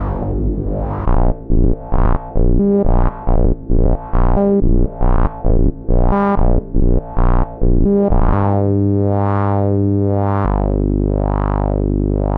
ROBERT Charlène 2018 2019 Stressfulbeat
This sound is a bit weird and stressful. I wanted to made a tempo so I create several sounds and I cut them and I bunk them on a runway. Finally, I apply a "Wahwah" the results is a metallic and strange beat, like a sample for an electronic music.
Descriptif selon la typologie de Schaeffer :
Code : X''
Précision morphologie :
Masse : Groupe de sons, nodal
Timbre harmonique : Acide
Grain : rugueux
Allure : Lissée
Dynamique : abrupte
Profil mélodique : Variation scalaire
Profil de masse : Calibre